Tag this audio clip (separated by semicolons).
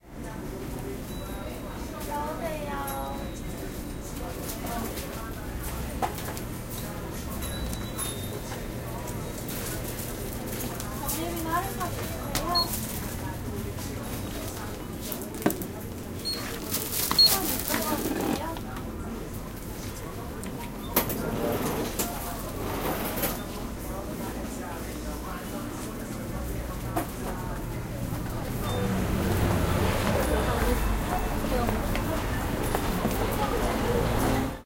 korea; field-recording; machine; door; traffic; korean; voice; seoul